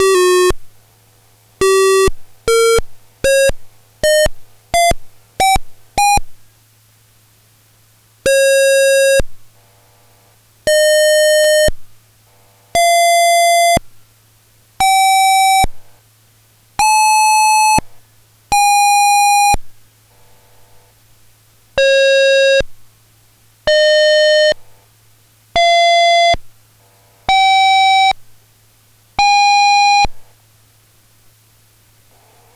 Monotron-Duo sounds recorded dry, directly into my laptop soundcard. No effects.